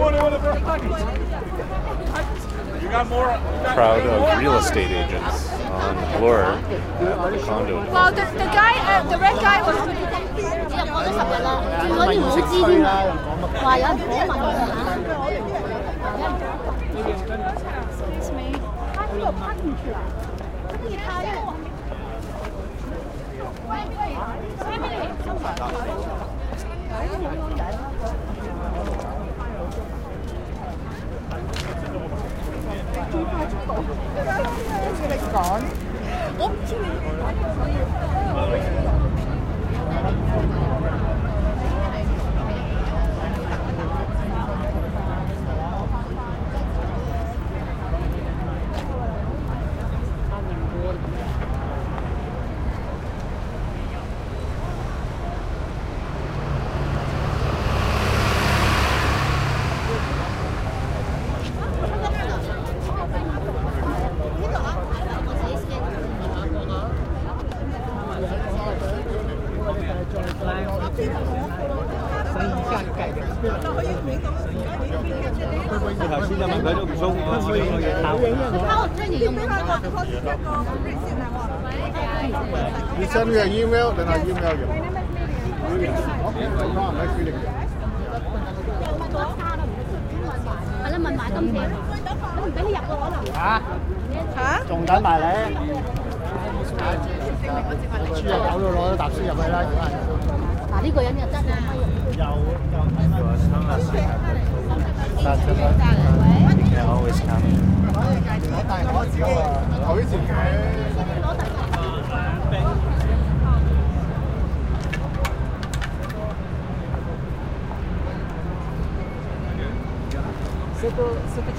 Chinese Crowd
st, traffic, bloor, sidewalk, toronto